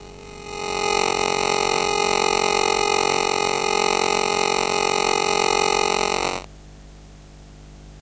Recordings made with my Zoom H2 and a Maplin Telephone Coil Pick-Up around 2008-2009. Some recorded at home and some at Stansted Airport.
bleep, buzz, coil, electro, field-recording, magnetic, pickup, telephone